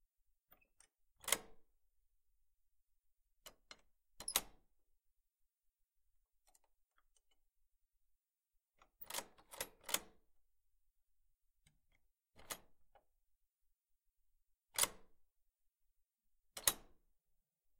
Lock Unlock Wooden Door
My parent's house has a big metal door that clunks when you unlock it, so I recorded myself playing with it for a bit.
opening, large, open, creak, closing, squeak, handle, clunk, unlock, close, door, wood, clank, lock, key, heavy, metal, squeaky, wooden